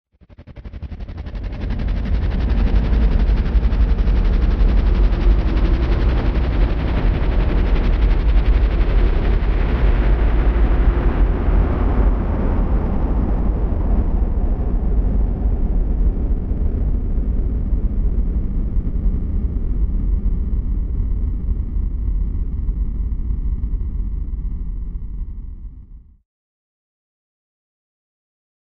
Approaching helicopter mp.3
Designed Cinematic Library FX
helicopter, Approaching, FX